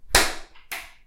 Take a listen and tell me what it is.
Dark Switch - 4
Switches being toggled and pressed in various ways
dial, switch, controller, trigger, button, band, toggle, leaver, control